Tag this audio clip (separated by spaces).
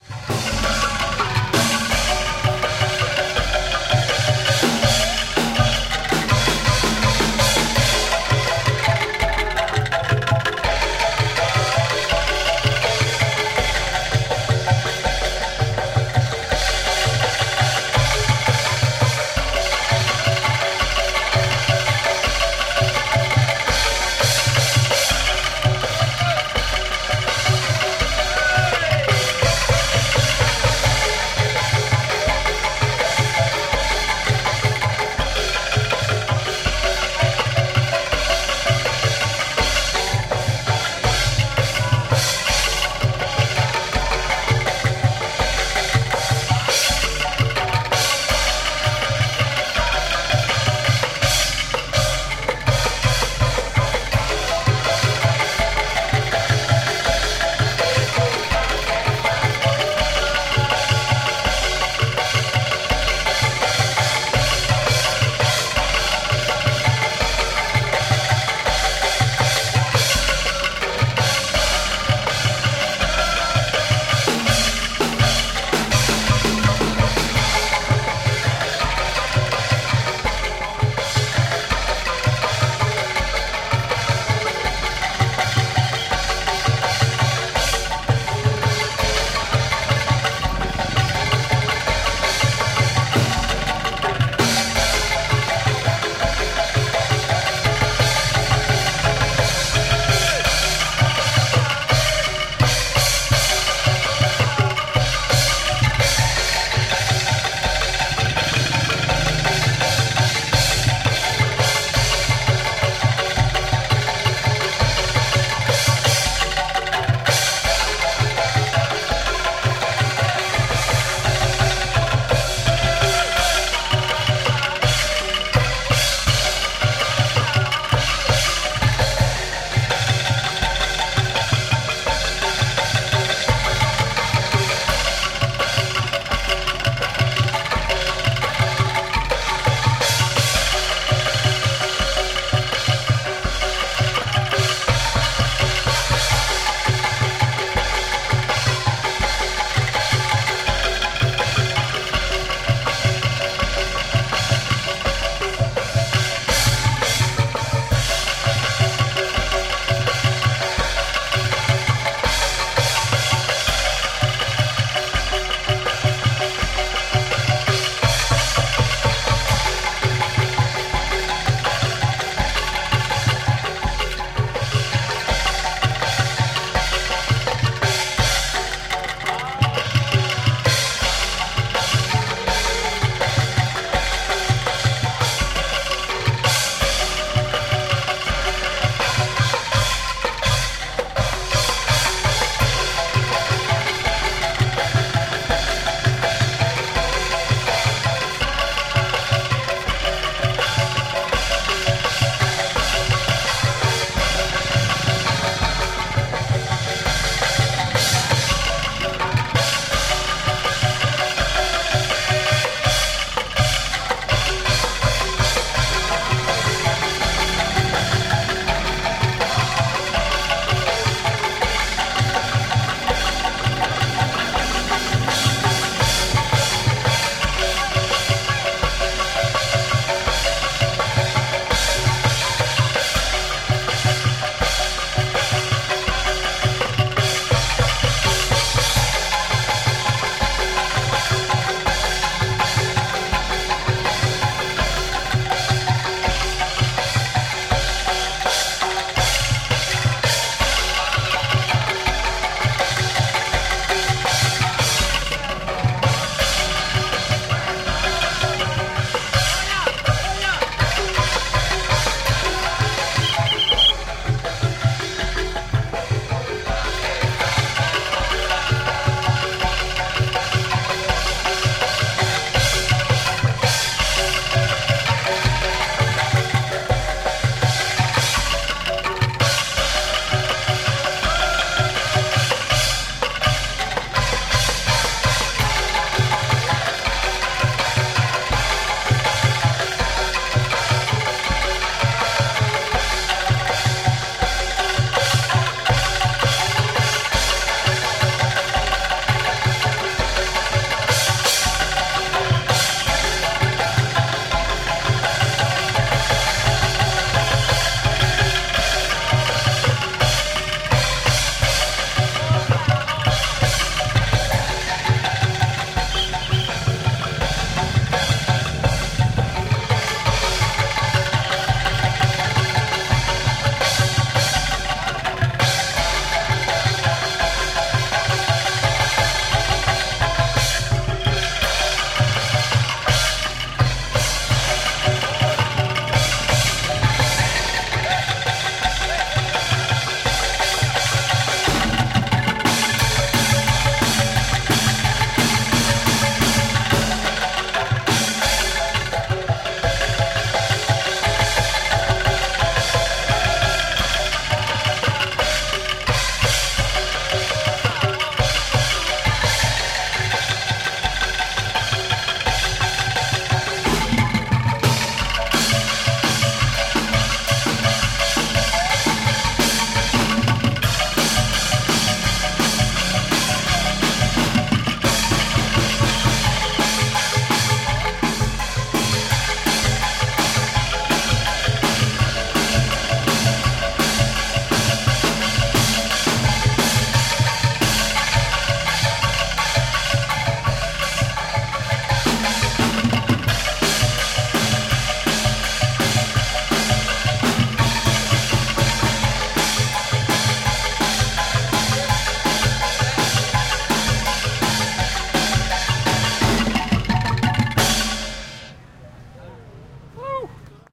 Java Rajiwali drums Busking jaya pendopo xylophone Asia field-recording contemporary Jogja kendang sekaa karawitan street-performance Yogyakarta kebyar swara hit percussion